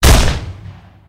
Coachgun Fire1
Coach gun fire sound
blackpowder, coach-gun, coachgun, fire, gun, shoot, shotgun, weapon